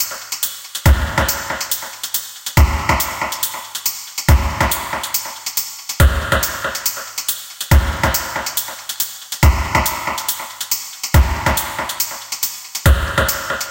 DM 70 DRUMS ONEDROP PROCESSED
DuB HiM Jungle onedrop rasta Rasta reggae Reggae roots Roots
DuB, HiM, Jungle, onedrop, rasta, reggae, roots